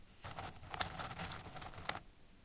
Short potpourris rustling sound made by stirring a bowl of it